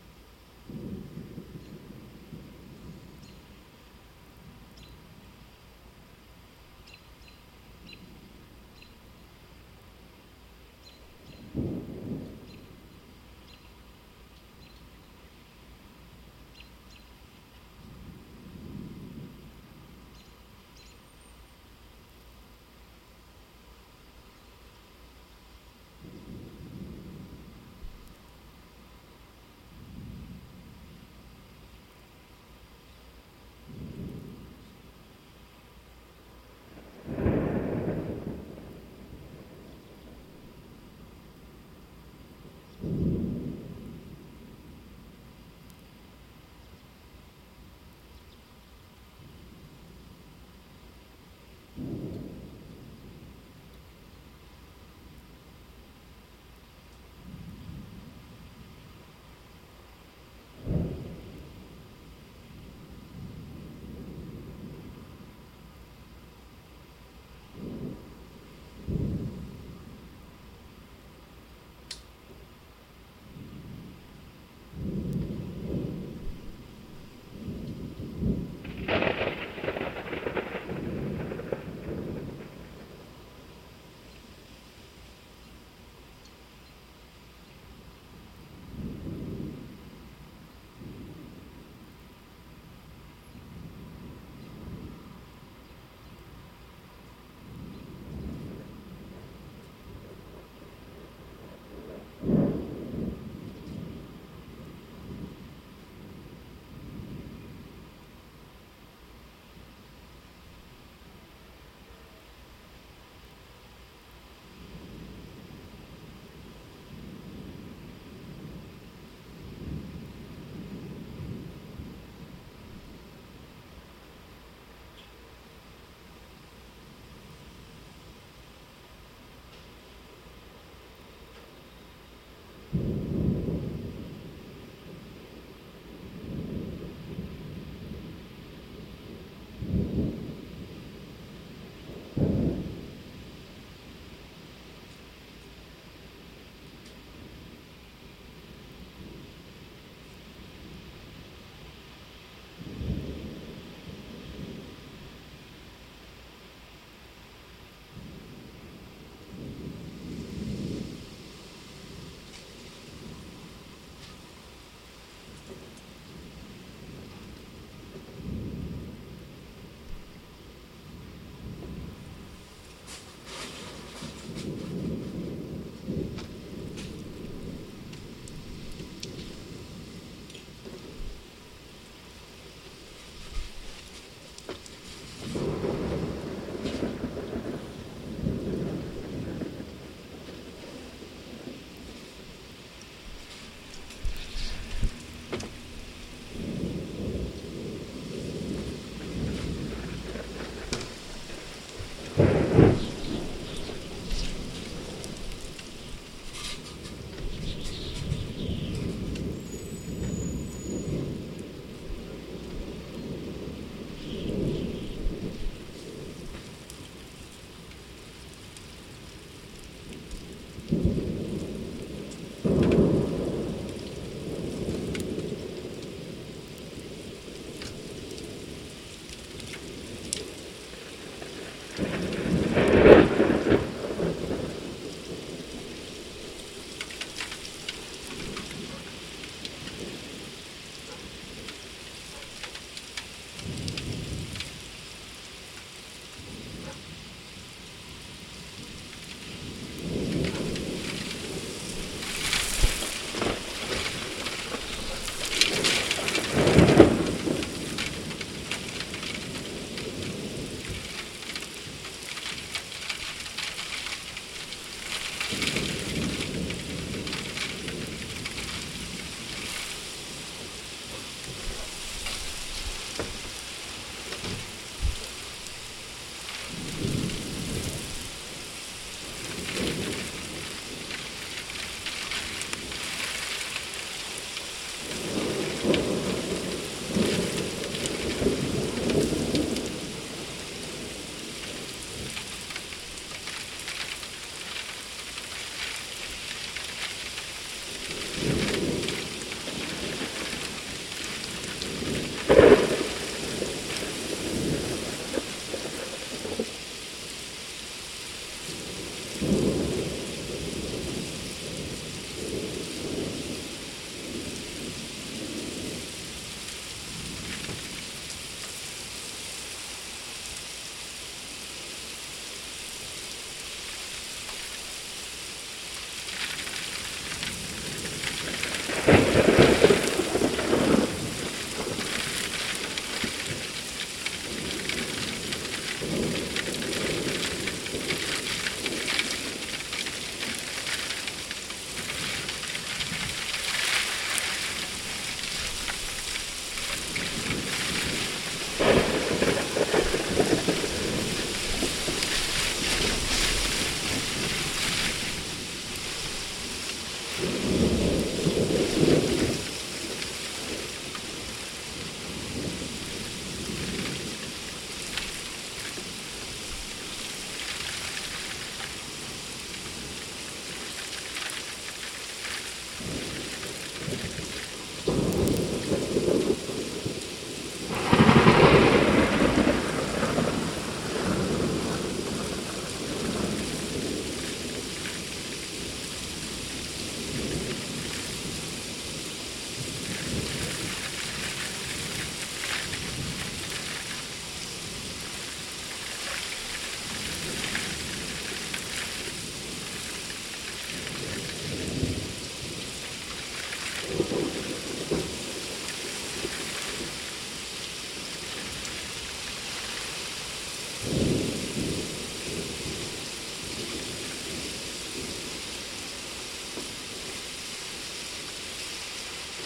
Engine 11 Part 1 Heavy Thunder Storm Coming 060605
Part 1 of 3 Thunderstorm Approaching 6:59
field-recording, nature, open-space, rain, storm, thunder